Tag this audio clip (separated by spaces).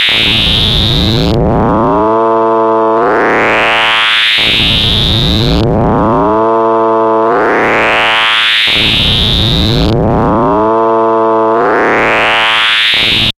drone noise soundeffect